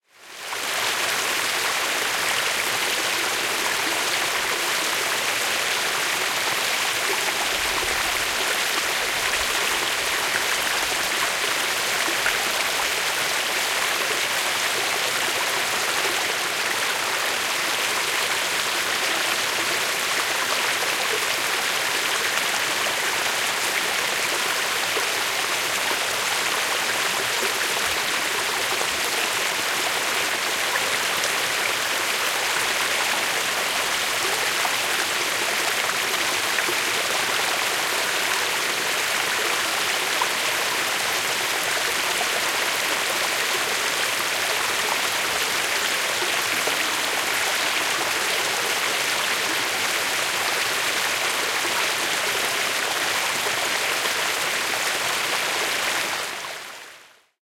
Vuolas, iso puro, vesi solisee ja kohisee.
Paikka/Place: Suomi / Finland / Kuusamo / Juuma
Aika/Date: 16.05.1978